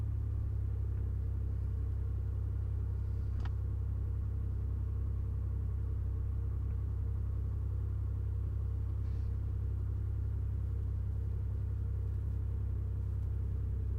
the sound of a 2001 buick century from inside the car.